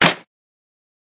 clap snare sample
snare, clap